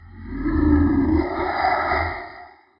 Scary Monster Roar #2
Scary monster roar.
creepy, horror, monster, roar, scary